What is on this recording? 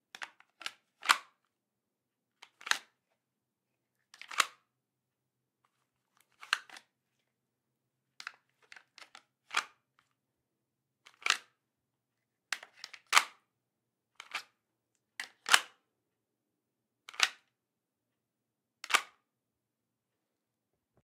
drawing a Glock 17 from its kydex holster, and re-holstering it.